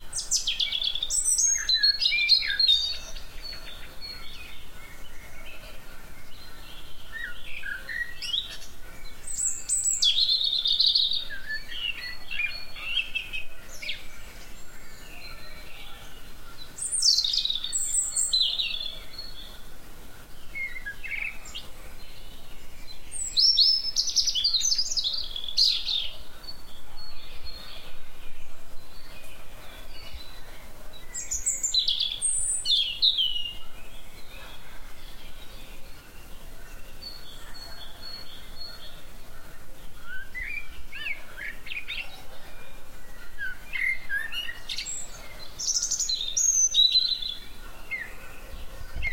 Birds chirping in spring season audio effects realized with Tascam DR-40X